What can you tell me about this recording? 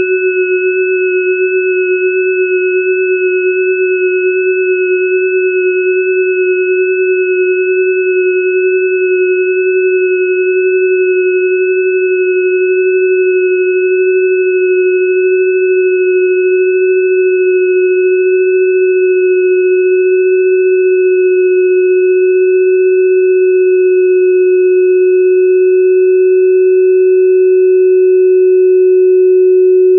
Long stereo sine wave intended as a bell pad created with Cool Edit. File name indicates pitch/octave.
synth, bell, multisample